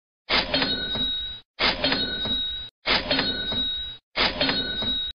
Cash Register Sound Effect
cash register sound
ds106, feet, foot